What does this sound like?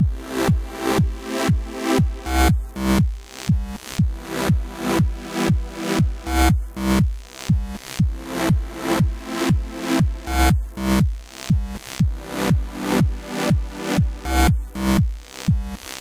Sidechain Pulse
The leadsynth is linked to the kickdrum in a sidechain, which is why it is pulsating like this.